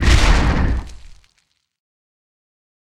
Impact 3 full
An impact explosion on a metal surface
detonate, bomb, explosion, tnt, explode, bang, boom, explosive